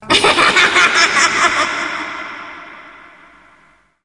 Evil cackle recorded for multimedia project
cackle, evil, hag, witch